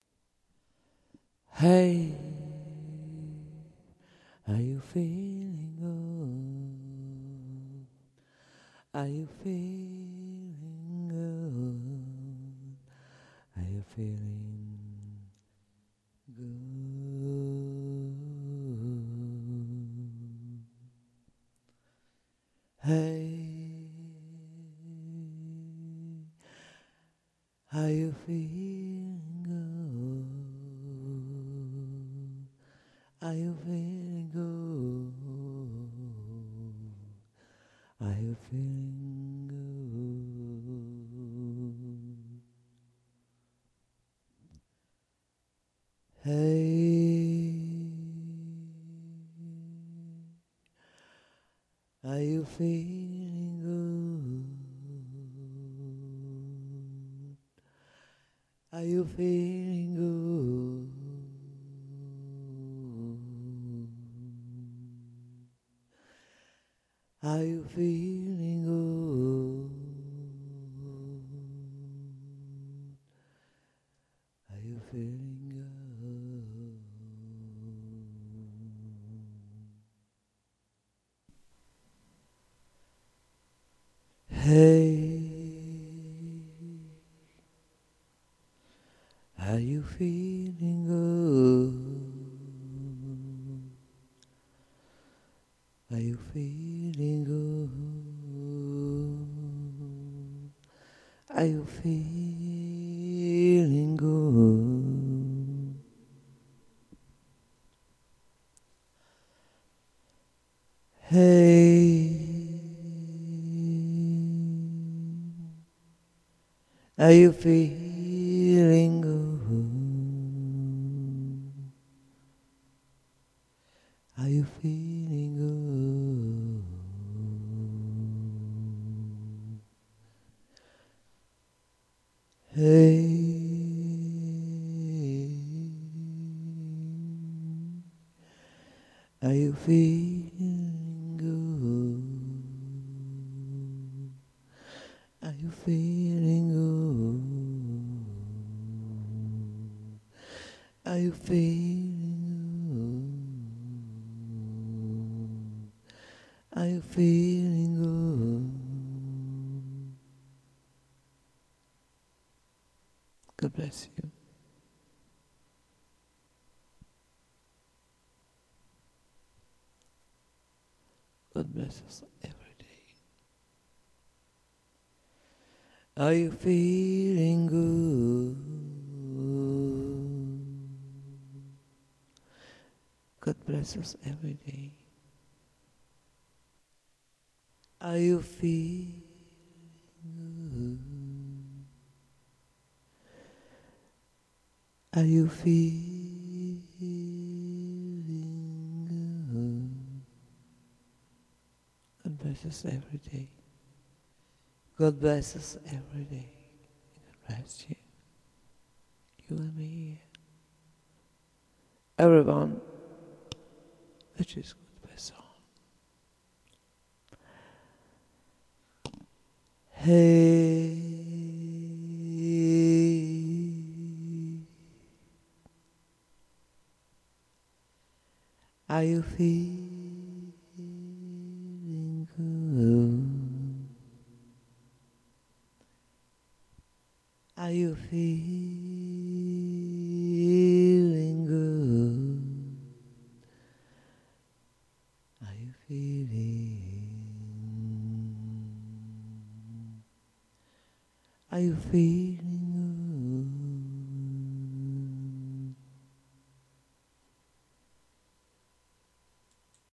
Hey are you feeling good
singing, vocal